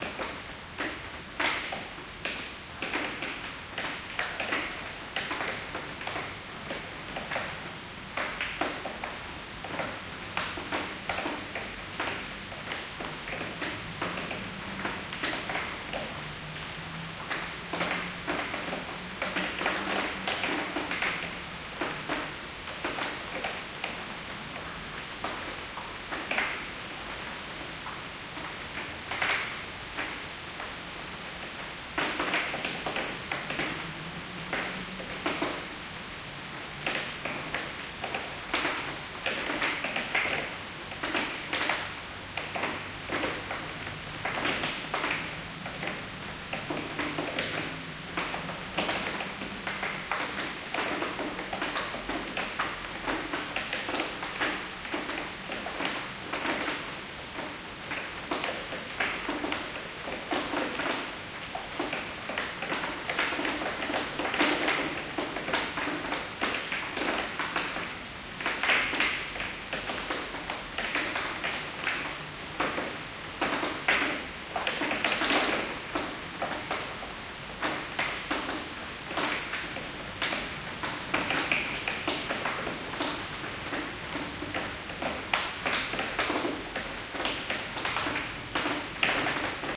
light rain at my Bangkok house recorded with a pair of Shure SM58. recorded simultaneously at two rooms in the house.
rain, shower, weather